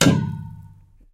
Plastic sewage tube hit 18
Plastic sewage tube hit
sewage, tube, hit, Plastic